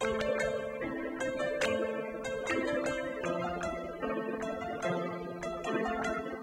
Piano Gertruda (Ready for Loop)
Easy melody. Cutted for loop.
loop, easy, piano